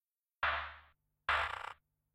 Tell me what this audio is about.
flstudio random actions